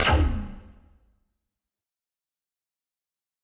laser blast 1
A little fun in Vitalium + LMMS
alien, blast, damage, digital, fire, gun, impact, laser, phaser, ray-gun, sci-fi, scifi, sfx, shoot, shooting, shot, sound-design, space, space-war, weapon